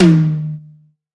my original DW toms, mixed with themselves 1 octave up [the octave up is lowered -6dB and the envelope reshaped to allow loud only the attack]
then for that resulting mixdow again the same thing [so I used the same method two times]
at all steps I was using the simple compressor[not multiband] for not allowing overlimiting.
for the two general mixdowns [because it was a double process] I used hard compressor at -0.45 dBs
and for the two octavic parallel channels I used hard compressor -3.60 dBs
because the octave doesn't sound realistic if not well compressed.
For all my DW octavic tomsed I used the same exact method.
My original DW toms are here if you want to read data or mics.
These toms do not sound realistic as stand alone sounds.
These harmonics work better only in a complete mixdown, and have increased audibility than the original recordings [for silent music parts use the original because low velocity of parallel instruments will expose the supportive tom-harmonics].